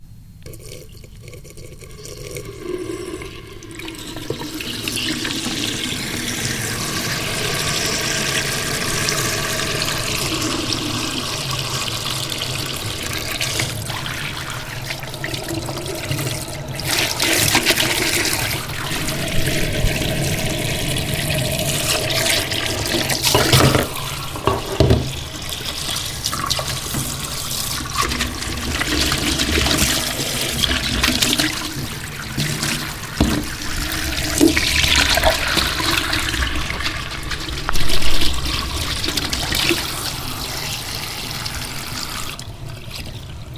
Metal sink, tap and water dripping and streaming sounds.
Recorded with Sony TCD D10 PRO II & Sennheiser MD21U.